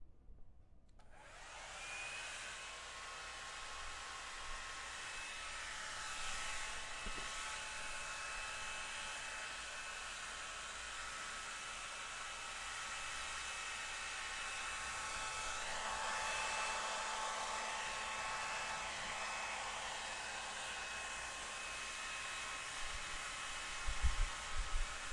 Sonido de ramas de arbol siendo agitadas